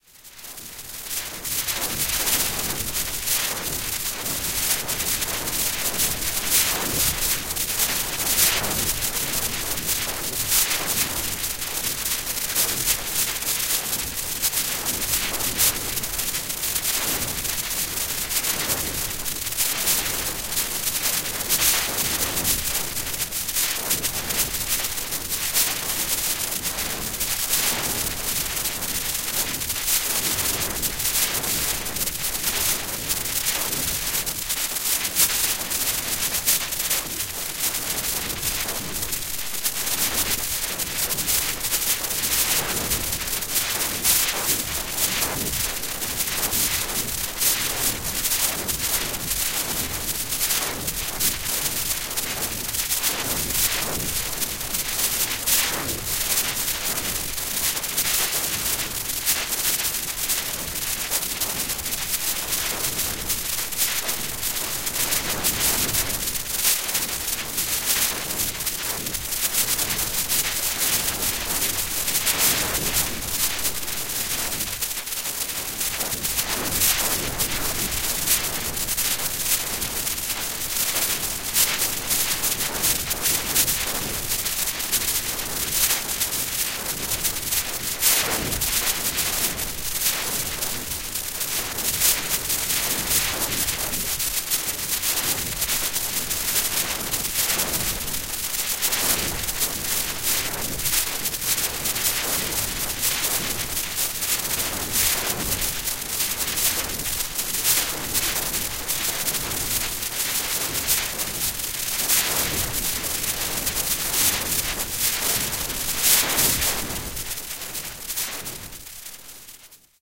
Noise Garden 20
1.This sample is part of the "Noise Garden" sample pack. 2 minutes of pure ambient droning noisescape. Another noise escape.
soundscape, reaktor, effect, electronic, drone, noise